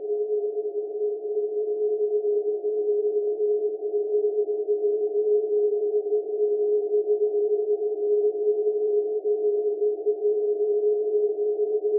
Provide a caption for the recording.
Wave nr 1
cold, sound-art, wind, winter